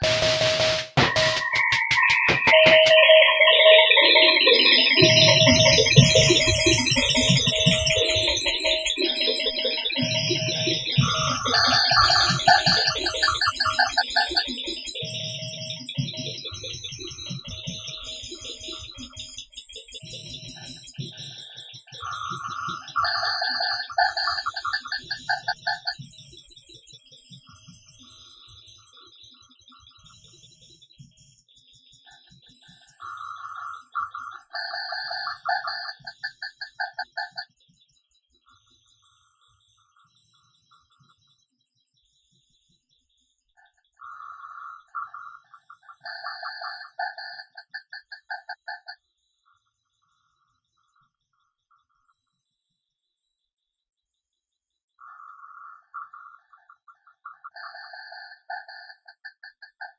DISTOPIA LOOPZ 029 80 BPM
DISTOPIA LOOPZ PACK 02 is a loop pack. the tempo can be found in the name of the sample (60, 80 or 100) . Each sample was created using the microtonic VST drum synth with added effects: an amp simulator (included with Cubase 5) and Spectral Delay (from Native Instruments). Each loop has a long spectral delay tail and has some distortion. The length is exactly 20 measures at 4/4, so the loops can be split in a simple way, e.g. by dividing them in 20, 10 or 5 equal parts.